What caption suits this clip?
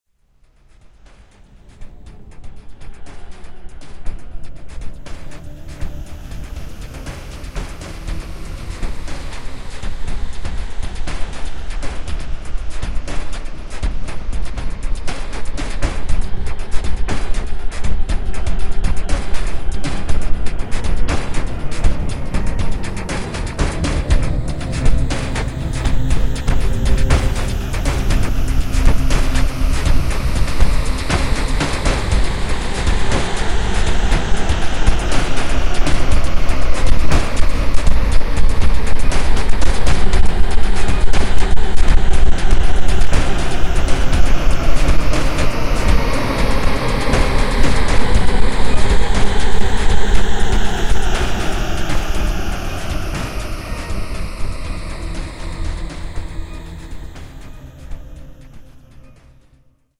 caveman stomp
this was put together with sounds from ambienza and blupon73 for a soundshoots theme "Cave"
ambienza, blupon73, cave, dark, remix